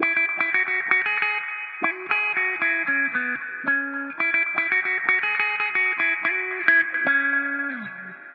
DW 115 C# GT FUNKY LOOP
DuB HiM Jungle onedrop rasta Rasta reggae Reggae roots Roots
DuB, HiM, roots, rasta, onedrop, Jungle, reggae